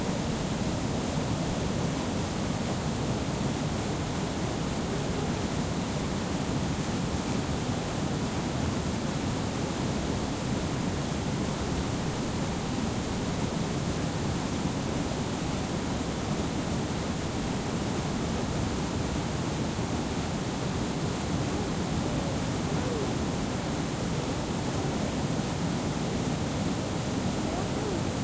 Small waterfall in Norway with kids voices on the background. Recorded with H43.